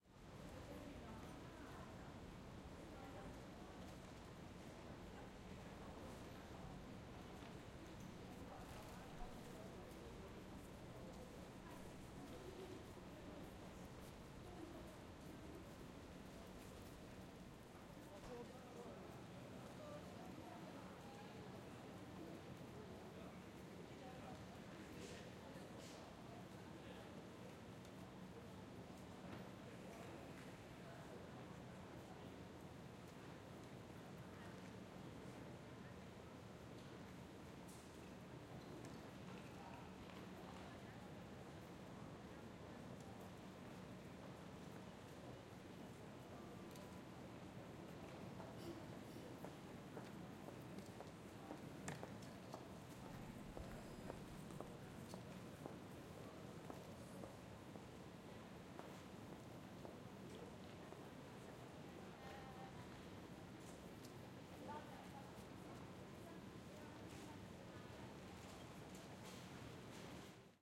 Central station ambience